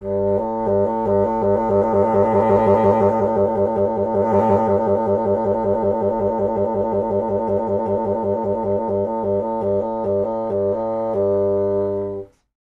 Fgtt Trl G1-A1
fagott classical wind
classical, wind